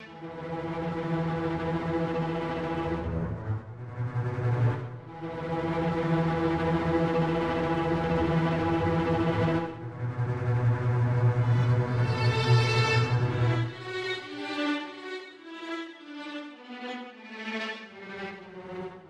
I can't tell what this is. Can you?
These are string samples used in the ccMixter track, Corrina (Film Noir Mix)
processed, strings, tremolo